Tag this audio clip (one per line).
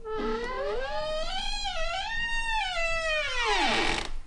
crackle
doors